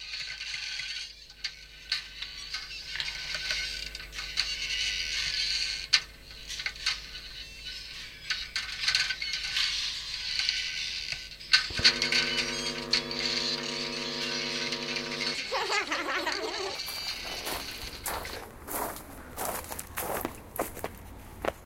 Caçadors de sons - Chuky
A workshop in which we are introduced to some tools and methodologies of Sound art from the practice of field recording. The sounds have been recorded with portable recorders, some of them using special microphones such as contact and electromagnetic; the soundtrack has been edited in Audacity.
Cacadors-de-sons, Fundacio-Joan-Miro